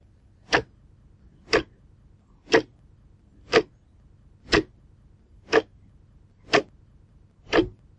Clock Mechanism Ticking
Wall clock tick tack sound recorded, looping perfectly.
Recorded with a Zoom H2. Edited with Audacity.
Plaintext:
HTML:
battery, battery-powered, cheap, chronos, clock, clockwork, loop, tack, tic, tick, ticking, tick-tock, time, wall-clock